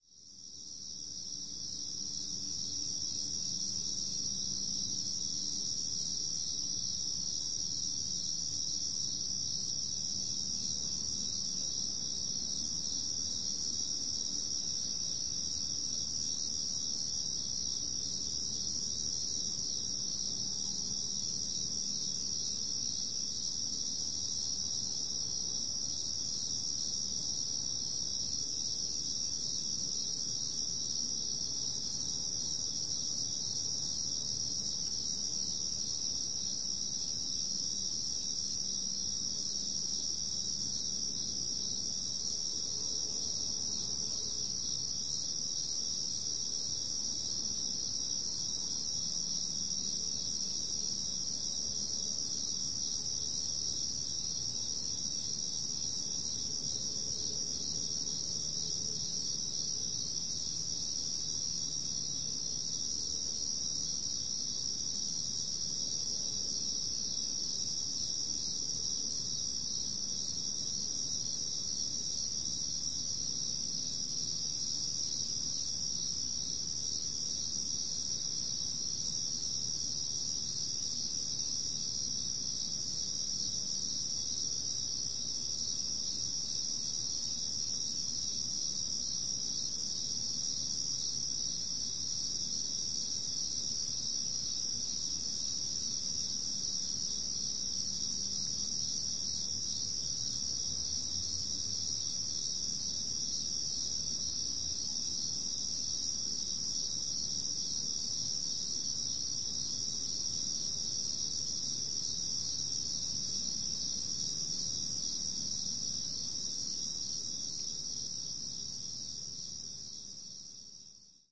A recording made around 6:00AM on a warm morning in late summer. I used my Zoom H4N recorder with the built-in stereo microphones.